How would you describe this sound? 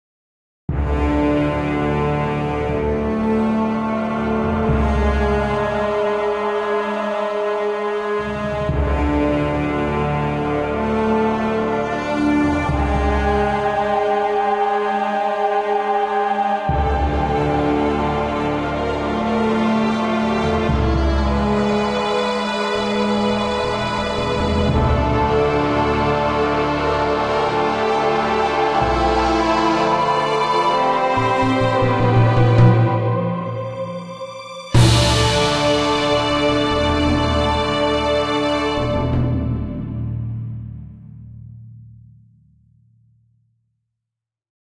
Orchestal music game
song composed by me for casual game "chile vs aliens"
composed "reason 5", Orchestal refill (Sonic refills)